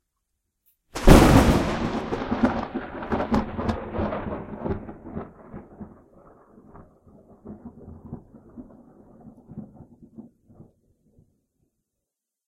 Extremely Close THUNDER! (no rain)
A thunder strike within about 1/4 mile. I recorded this with my Tascam DR-05. And I almost screamed. It's a good one, that.
close, heavy, lightning, loud, strike, thunder